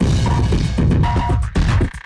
Crunchy lofi rendition of big beat. Exploding out of 9volt battery powered 30 year old drum machine, mixer and pedals.
Analog, Battery-Powered, Break-Beat, Explosive, Klang, Lofi, Lotek, Retro, Toy-Like